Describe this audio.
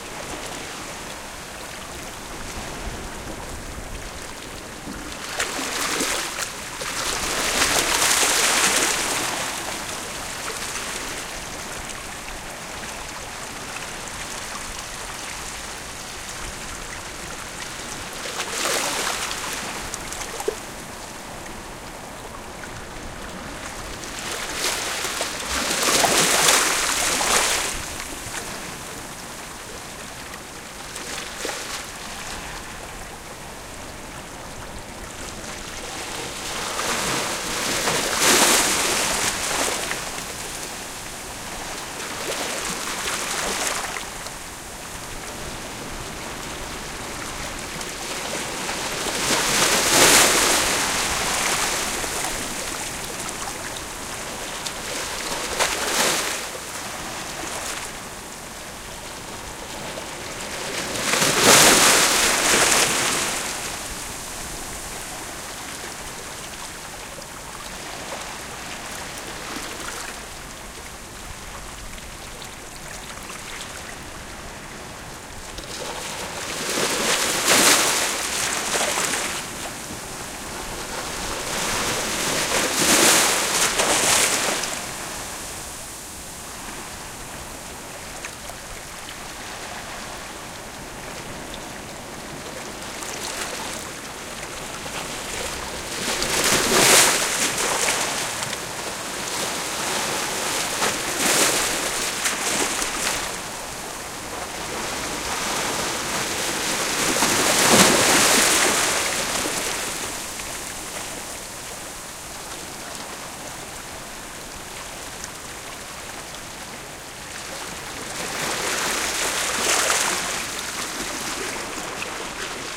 1. waves, close recording, beach, portugal
A very close recording of waves coming to the beach. I did it to get more details on the small differenc sounds waves are producing.